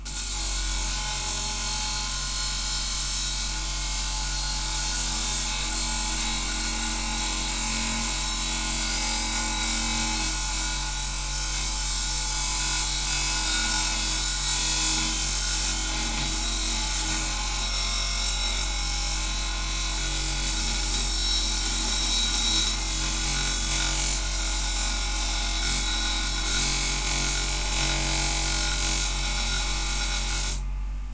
sharpening machine 007
Metal processing on a grindstone.
mechanical, machine, factory, sharpening, grinding, metal, industrial